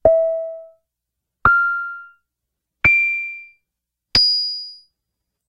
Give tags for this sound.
korg; cowbell

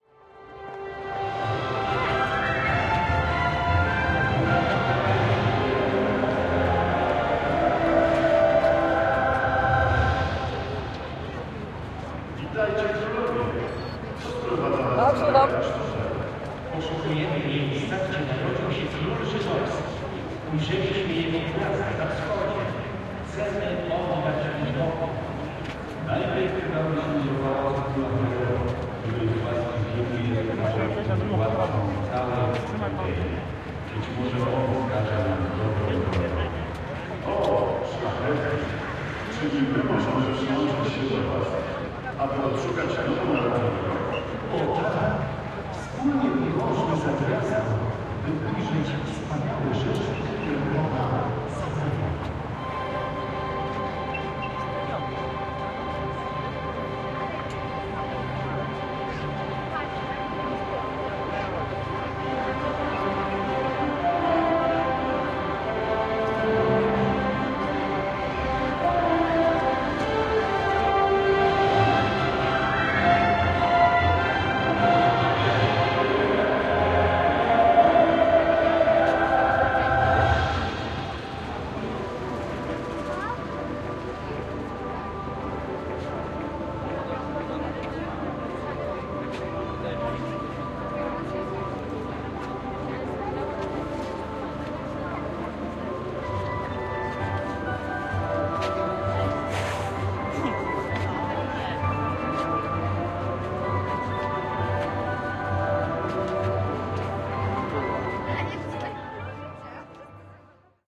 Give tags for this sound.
city; crowd; field-recording; music; people; Poland; Poznan; procession; street

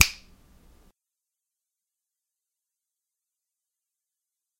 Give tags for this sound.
fingers; finger

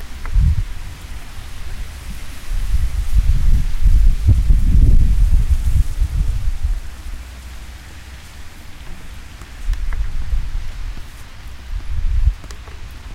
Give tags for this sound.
windy; environment; wind

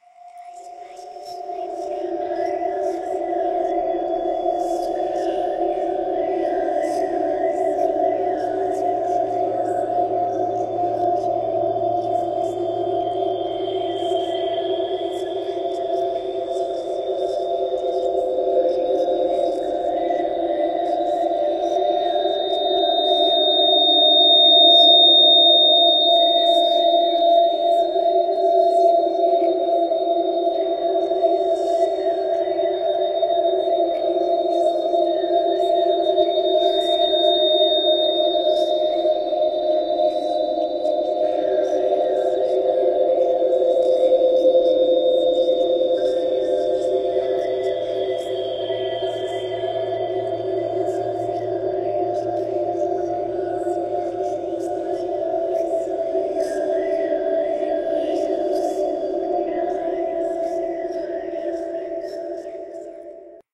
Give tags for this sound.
creepy; fear; ghost; graveyard; haunted; hell; horror; nightmare; scary; sinister; spooky; terrifying; terror; voices

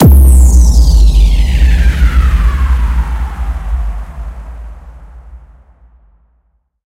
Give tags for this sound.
handsup; electro; hardcore

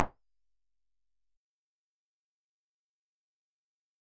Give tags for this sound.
drum; electronic

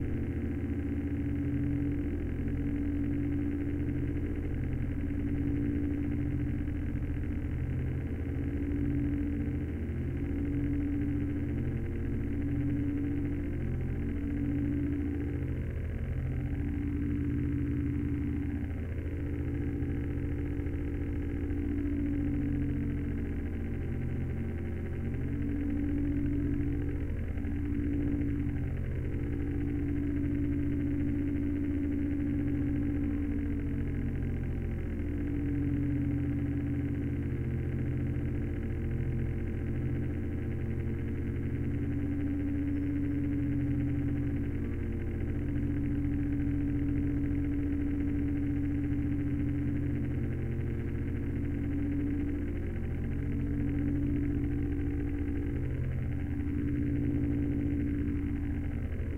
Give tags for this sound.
recording; stereo

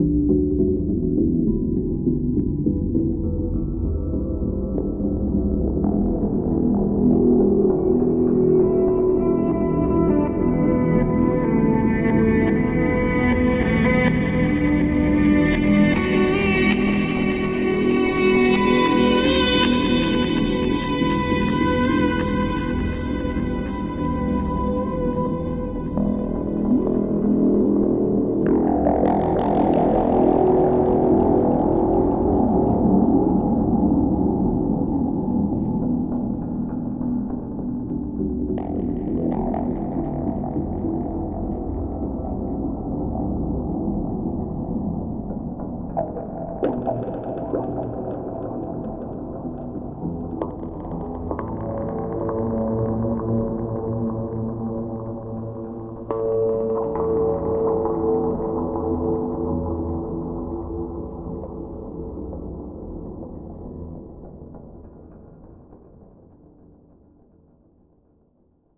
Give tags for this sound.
ambience; choir; cinematic; guitar; music; pad; processed; synth; voice

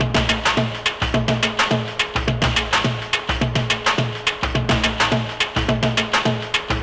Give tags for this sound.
drumloop; experimental; lo-fi; lofi; loop; percussion; percussive; rhythmic

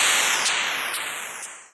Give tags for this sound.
strange; weird; noise; freaky

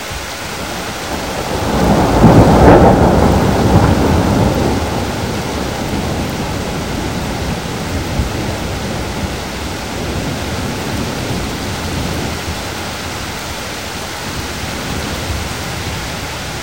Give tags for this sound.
NATURE; RAIN; STORM; THUNDER; WIND

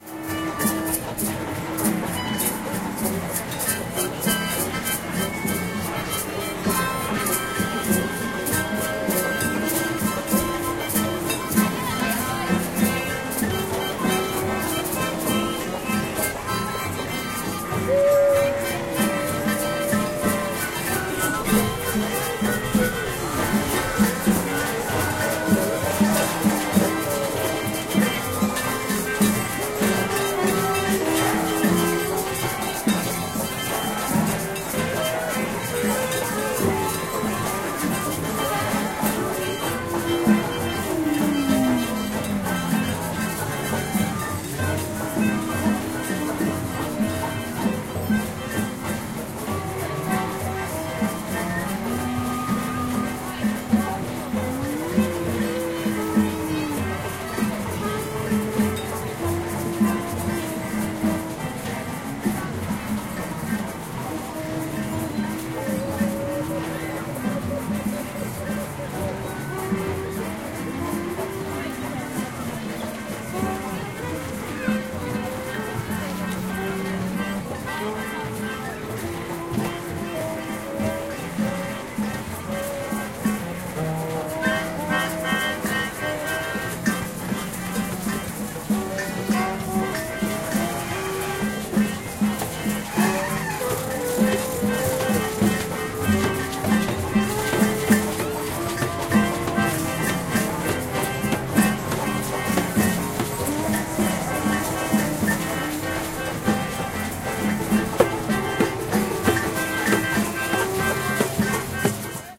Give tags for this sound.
parade; street; festival; carnival; demonstration

